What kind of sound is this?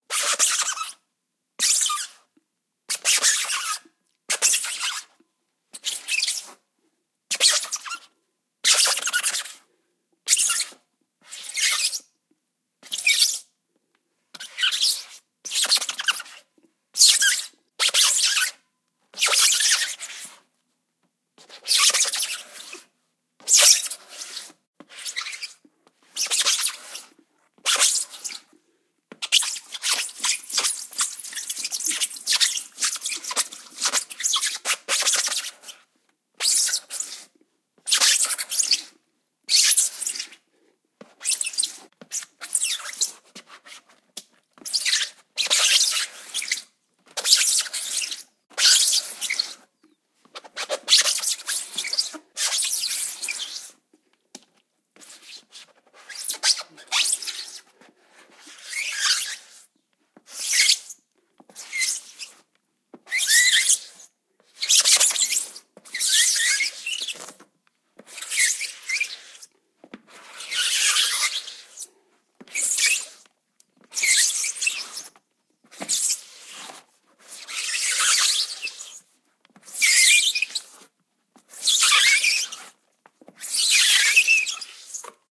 Rubber Skid
Rubbing and sliding a wet shoe on a wet tire to make a rubber squeak/sliding sound. Recorded with a Tascam DR-05.
friction, rubber, shoe, skid, slide, squeak, squeaky, squick, tire, wet